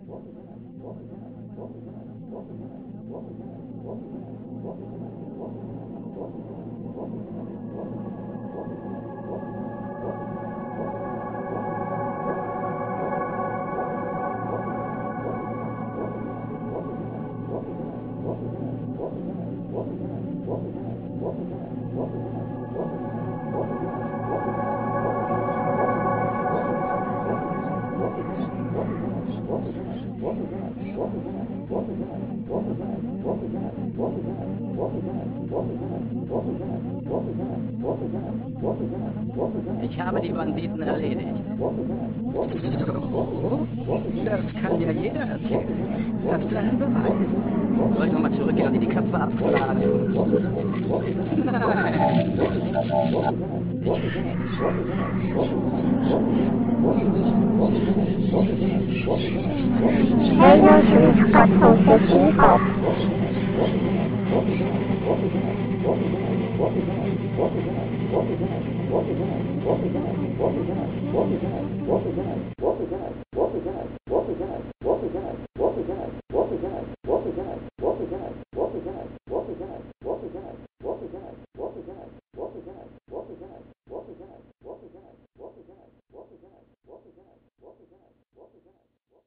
Hidden Track #27
All sounds and samples are remixed by me. A idea would be using this sound as a hidden track that can be heard on some artists music albums. e.g. Marilyn Manson.
voice, alien, weird, vocoder, processed, noise, hidden, electric, sample, atmosphere, experimental, ambience, electronic, effect, end, distortion, track